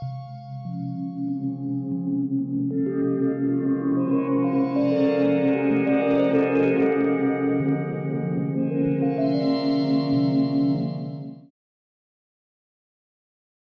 Micron Lost 2
Alesis Micron Stuff, The Hi Tones are Kewl.
acid, alesis, ambient, base, bass, beats, chords, electro, glitch, idm, kat, leftfield, micron, synth